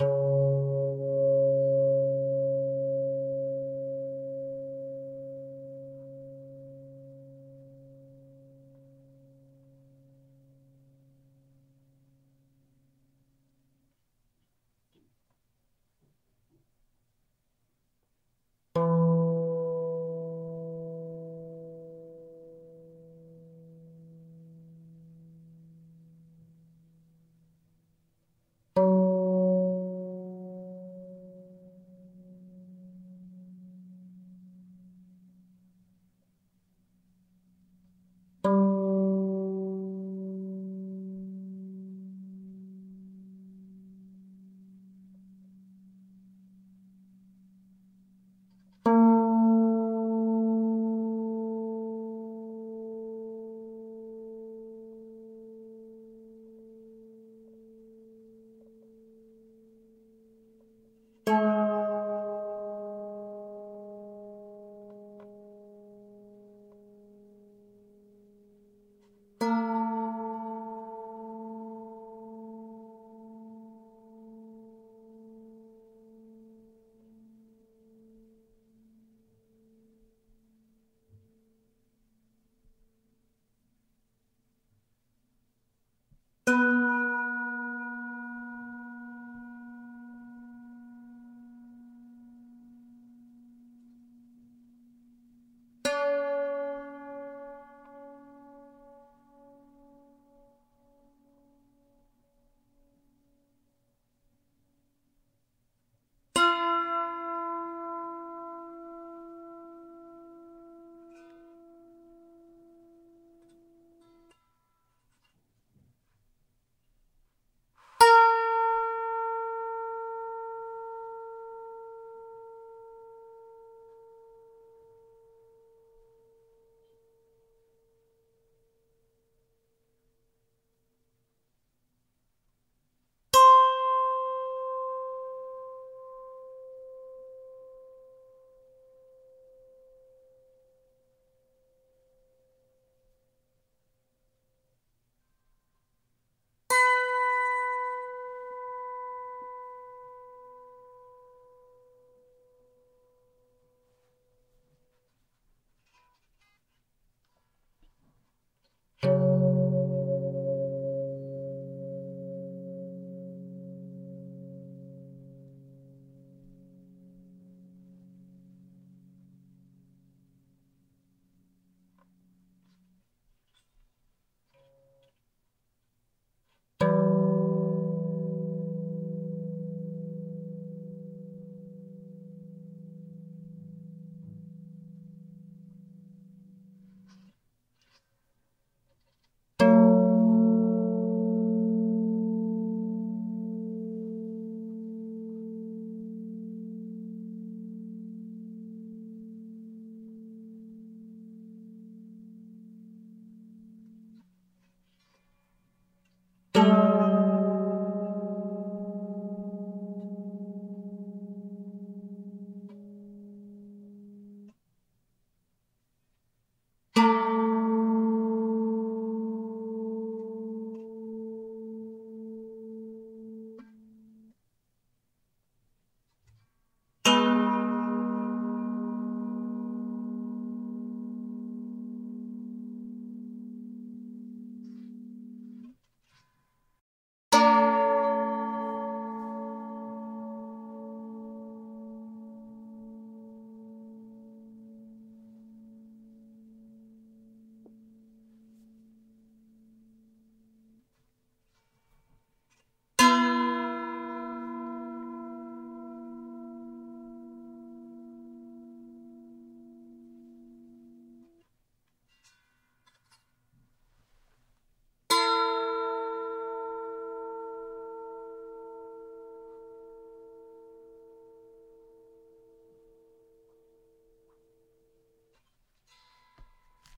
Psaltery Sounds
This is a mono recording of a Psaltery. This was recorded as-found with no tuning or cleaning done to the instrument. It is a series of single tones followed by two note chords. Dissonant and full of texture.
long-release, strings, pasltery, classical, chords, medieval, instrument